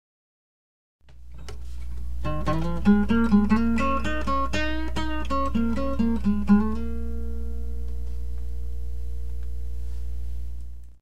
A Blues lick my old guitar
riff, guitar, blues